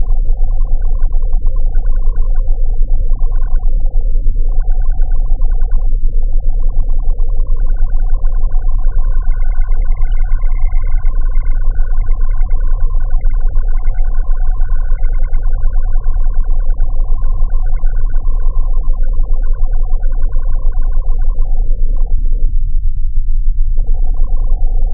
Bubbling / Beeping Low fi with rumble